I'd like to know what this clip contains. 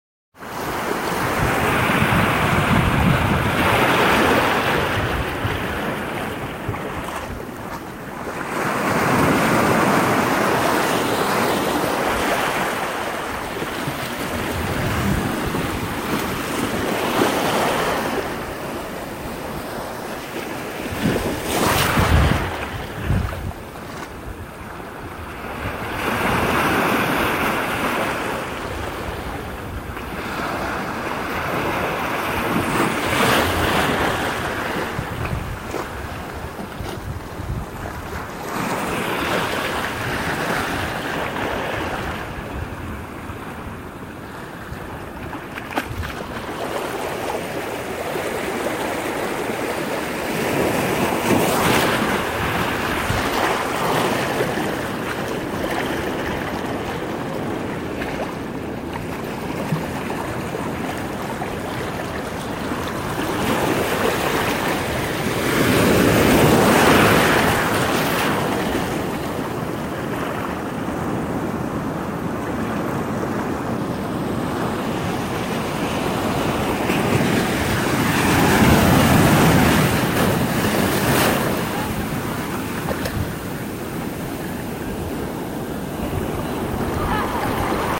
The sound of the waves at Victoria beach in Laguna Beach California. Recorded with my standard voice recorder from my Galaxy 7 Samsung smartphone.